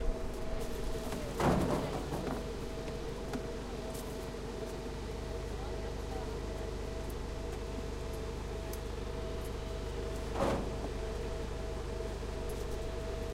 Field recording of people working during the wine harvest in the Penedès area (Barcelona). Dumping grapes to the tractor. Recorded using a Zoom H4.